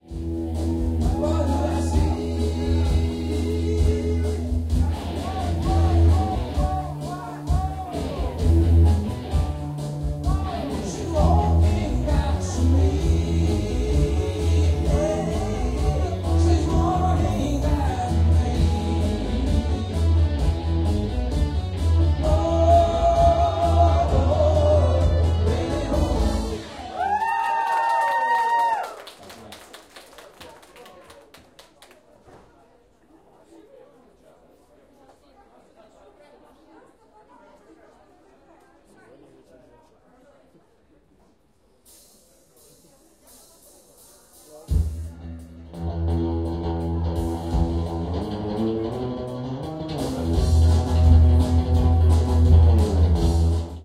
pub Vegas7
Atmosphere in the beer restaurant "Vegas" in the Omsk, West Siberia, Russia.
People drink and chatting and having fun, clinking glasses, dishes...
End of a song and start a new song.
Recorded: 2012-11-16.
AB-stereo
beer-restaurant, applause, Russia, Omsk, restaurant, scream, 2012, guitar, pub, dishes, fun, clinking, Vegas, clinking-glasses, glass, live, drunk, people, song, chat, West-Siberia, rock-n-roll, live-sound, noise, drink, beer, music